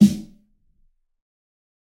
fat snare of god 016
This is a realistic snare I've made mixing various sounds. This time it sounds fatter
drum, fat, kit, realistic, snare